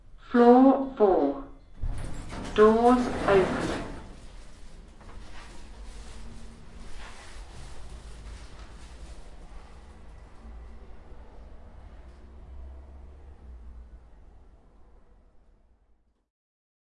Floor 4 lift doors opening spoken field recording elevator open I recorded this for a radio project. I needed spoken voice saying doors opening. It took ages to do this. But was worth it.